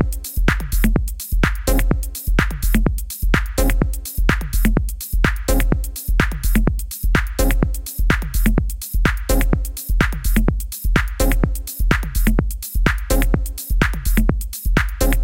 If you need more house drum loops, just tell me, I am ready to produce more.
126-bpm, drum-loop, minimal-house, percussion